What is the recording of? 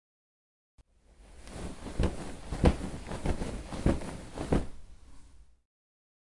06-beat-the-duvet
Sound of household chores.
CZ, Panska, Pansk, chores, Czech, household